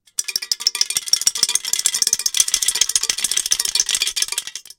Shaking a pop tab inside of a can.